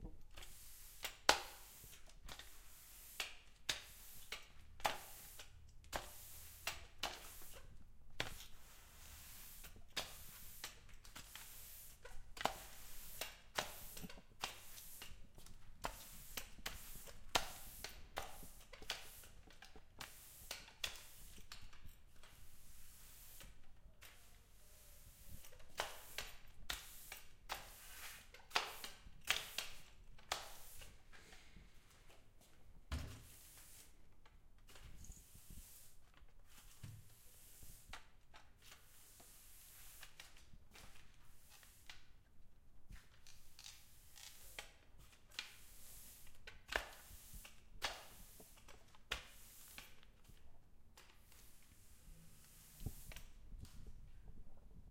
Sweeping Floor
The floor was dirty so it had to be swept.